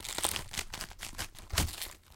Some gruesome squelches, heavy impacts and random bits of foley that have been lying around.